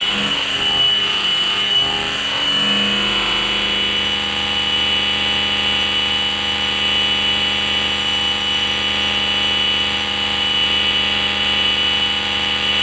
Binaural Ringmod Texture from Reason Subtractor and Thor Synths mixed in Logic. 37 samples, in minor 3rds, C-1 to C8, looped in Redmatica's Keymap. Sample root notes embedded in sample data.
Binaural, Multisample, Ringmod, Synth, Texture